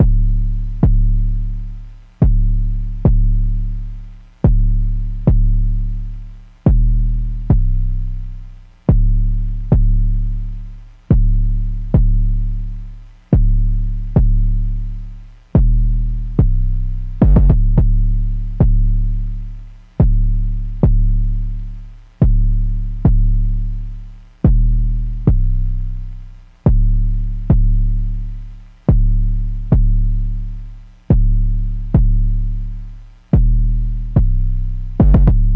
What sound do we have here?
Circuit 17 - Bass 2
Synth Bass Loop created with Korg Minilogue XD
124 BPM
Key of F Minor
August 2020